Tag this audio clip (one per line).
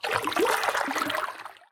river
water
field-recording
lake
zoomh4
splash